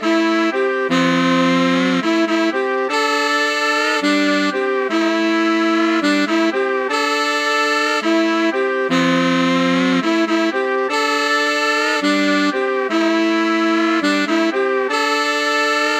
Sax loop, 120bpm. Ableton Live 8
sax, loop, natural